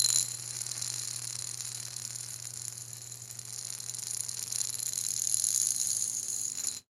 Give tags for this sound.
metal; ring; sound

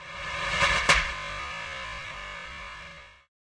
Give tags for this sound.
parts; remix

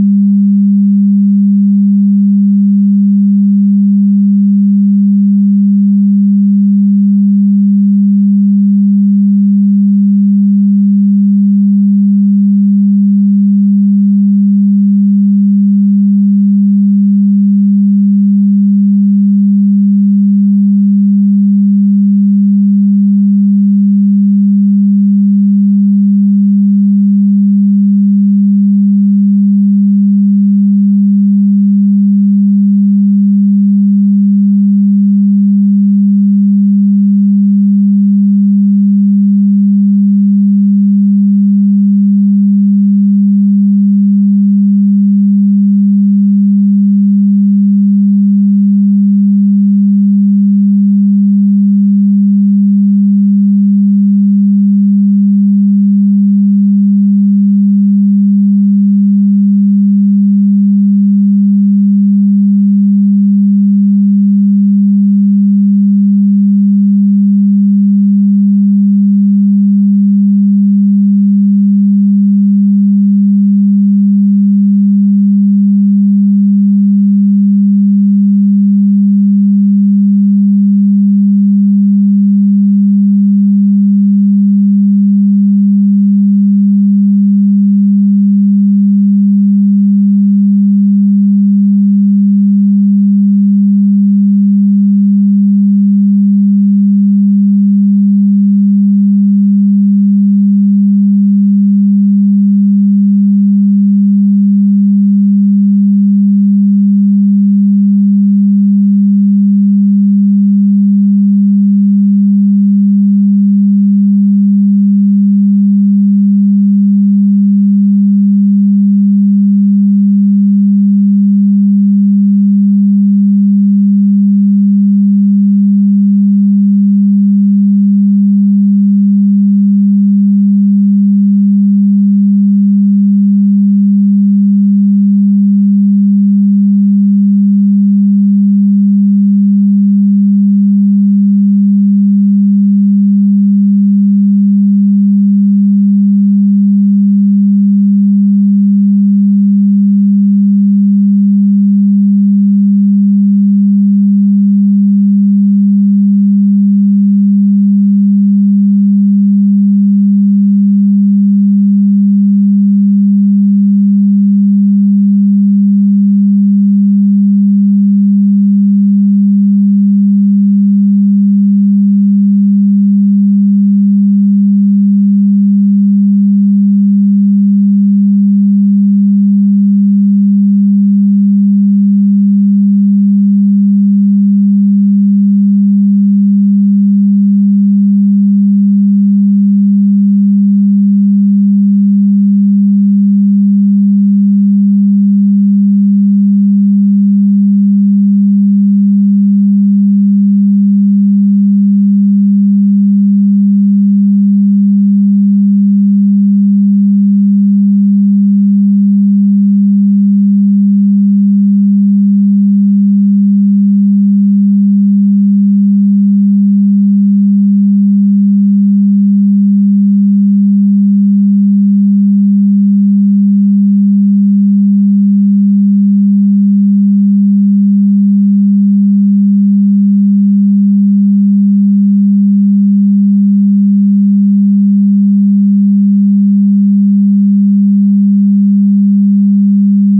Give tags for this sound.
synthetic; electric; sound

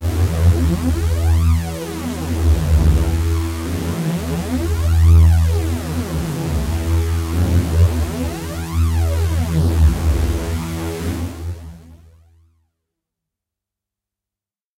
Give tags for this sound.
electronic; synth; multi-sample; hard; phaser; lead; waldorf

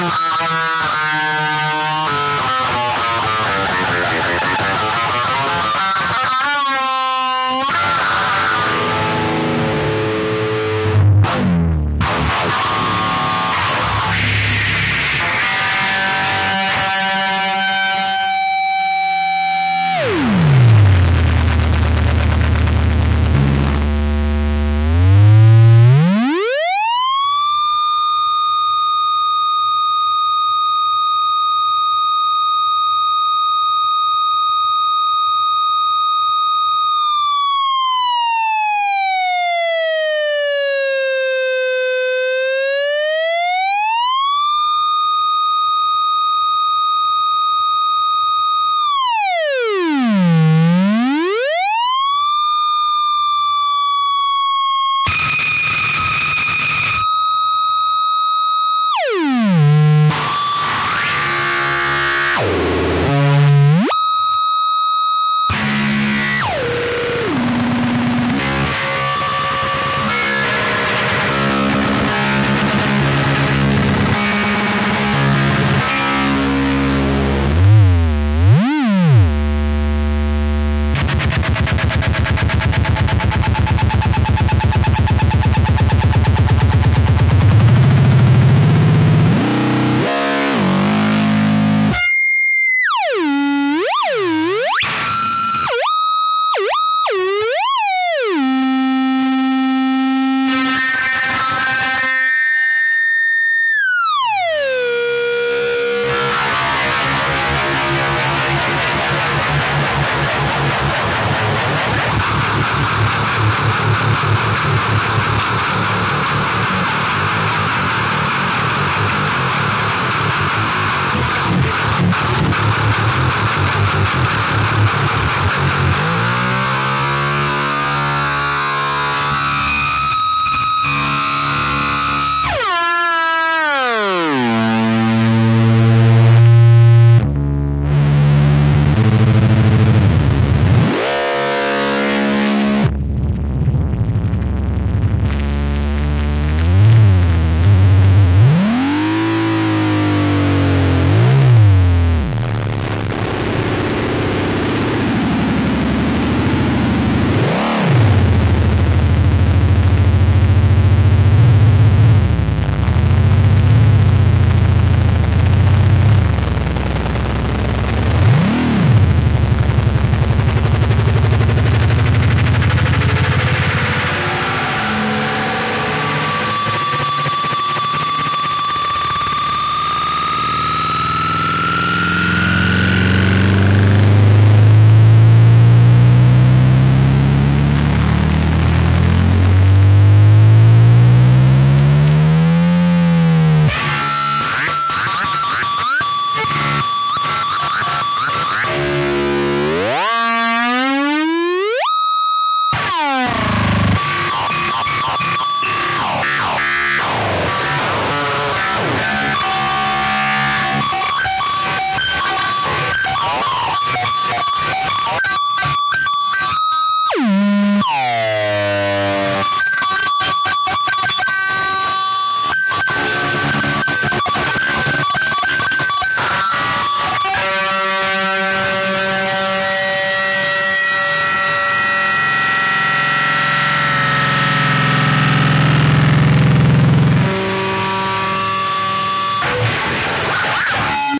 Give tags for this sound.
screetch; harsh; noise; scrambled; feedback; guitar; weird

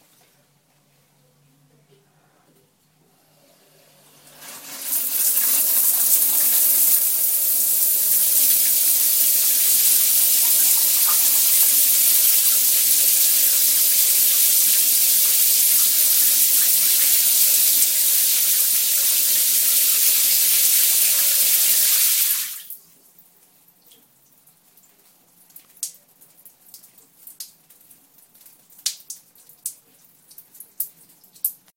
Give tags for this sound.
bath; bathroom; drip; dripping; running; shower; water

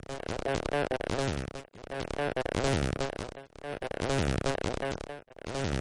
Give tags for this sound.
small; t; o; fuzzy; k; l

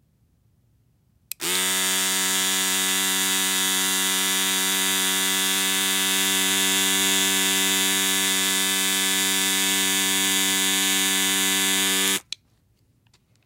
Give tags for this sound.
mechanical; machine; electric-razor

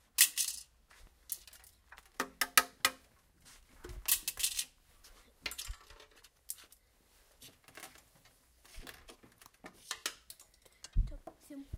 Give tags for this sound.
rennes; lapoterie; france; sonicsnaps

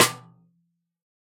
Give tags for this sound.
1-shot; drum; multisample; snare; velocity

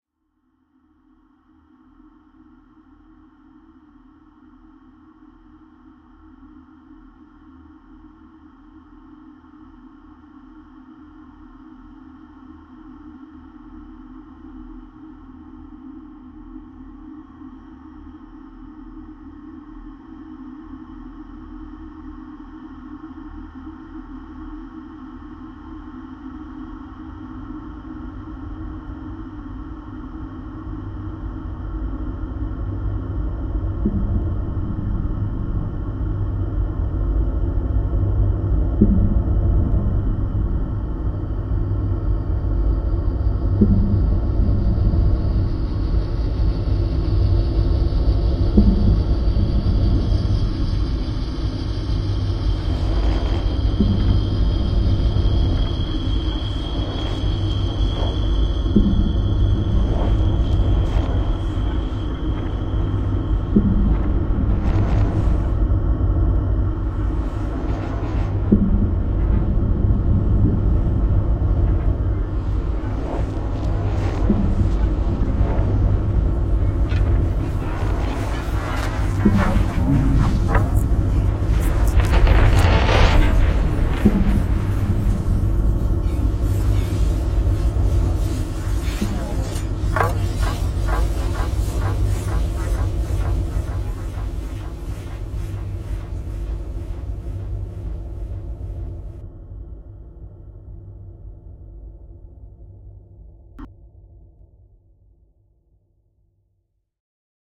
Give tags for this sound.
competition; dark; earth

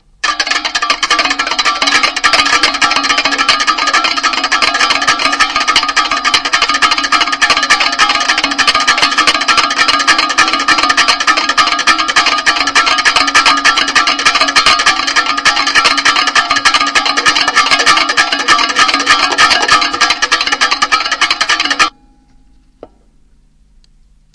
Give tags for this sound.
building-loops; fragments

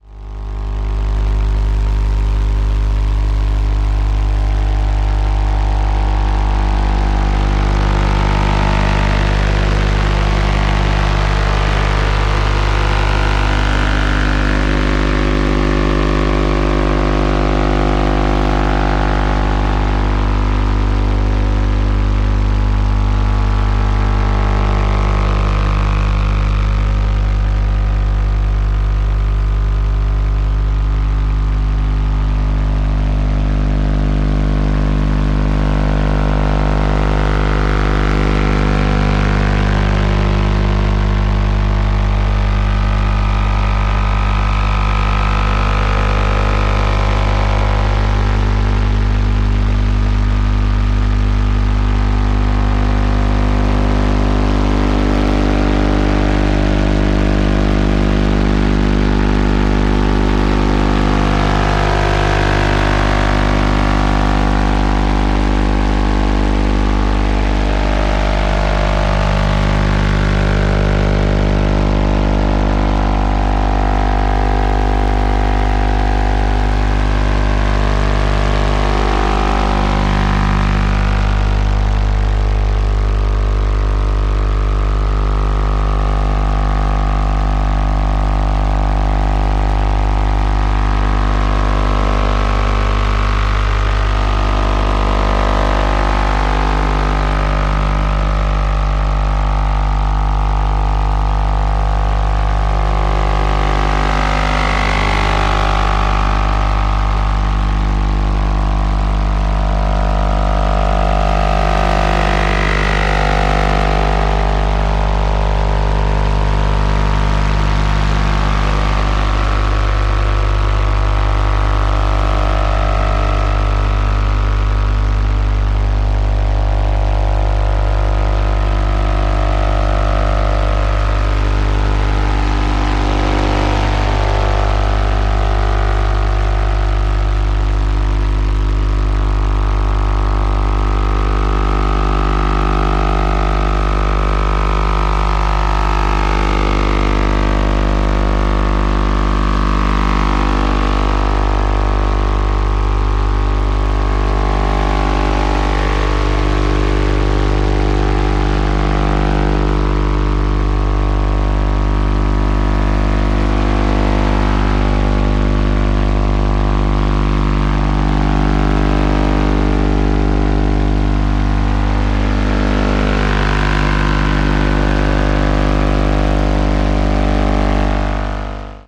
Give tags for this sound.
buzz; dxing; electronic; noise; radio; short-wave; shortwave; static

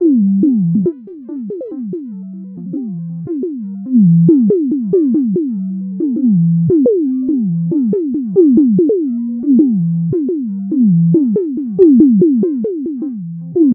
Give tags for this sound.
arp; arpeggio; filter; filtered; resonance; synth; synths

